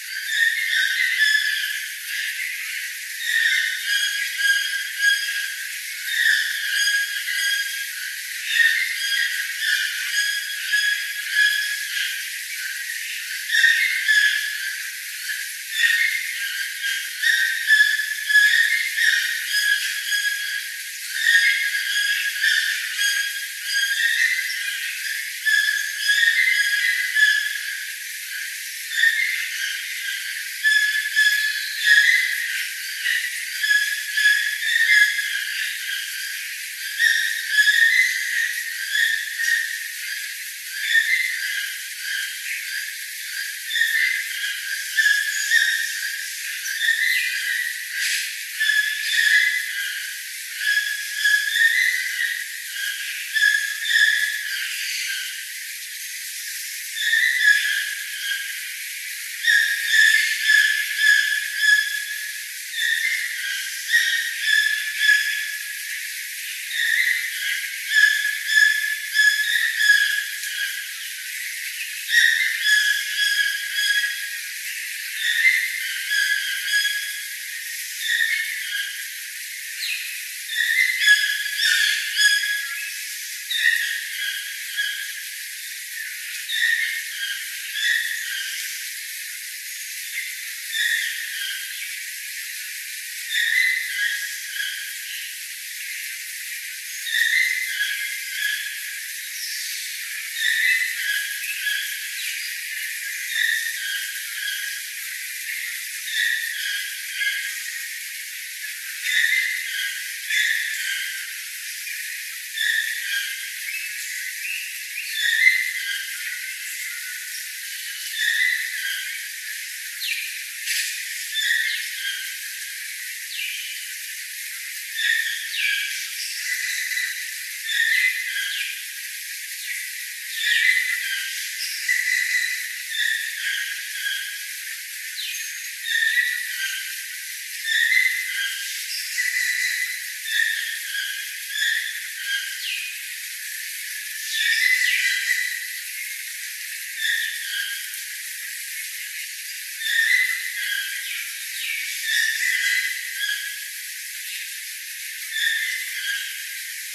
Recorded at the Dallas World Aquarium. This is a recording of three different species of toucans calling together. Red-billed, Keel-billed and Chesnut-mandibled Toucans can all be heard. This has been filtered to remove people talking, but the bird calls are still clear.
field-recording, tropical, exotic, zoo, jungle, toucan, birds, aviary, rainforest